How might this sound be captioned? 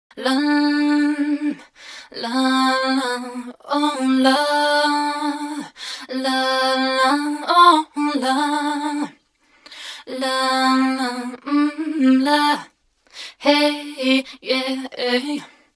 Total Laaaa Dry 120bpm
A number of people asked for this dry so here it is.
Female vocalist singing, a moment extracted from a track I was working on. It's at 120 bpm as you can see. Recording chain: Rode NT1-A (microphone) - Sound Devices MixPre (mic preamp) - Creative X-Fi soundcard.
120-bpm, melisma, la, singing